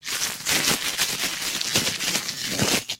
delphis FOLIE 1
crisp, crunch, fx, paper